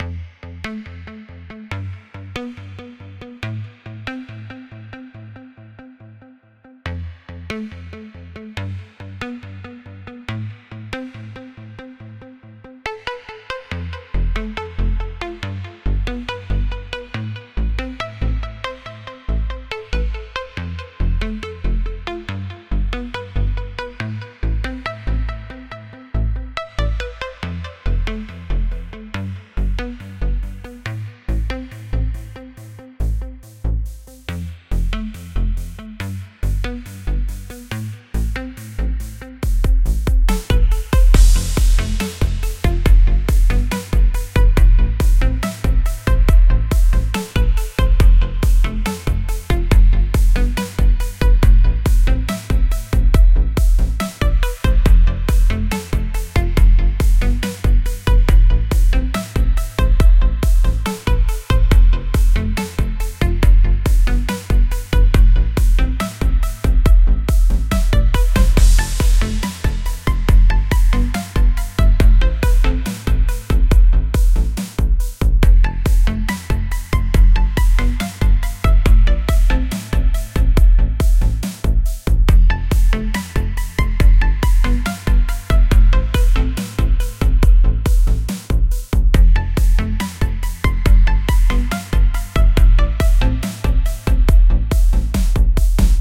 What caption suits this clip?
chill tune for a game
Simple, a bit melancholic slow tune, could work well for building or game main menu stuff.